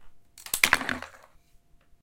glasses fall
glasses, spectacles, falling